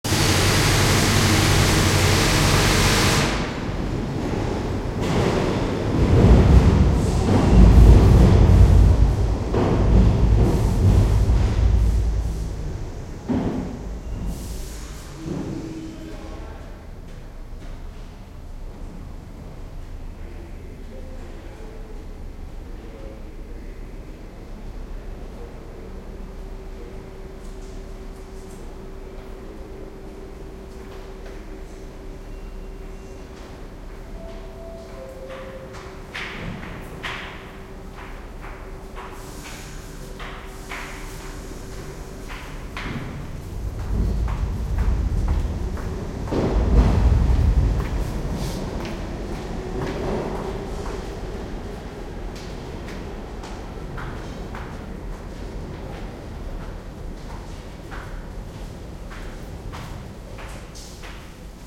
subway tunnel train pass overhead short +drilling, people walk by NYC, USA
NYC, USA, by, drilling, overhead, pass, people, short, subway, train, tunnel, walk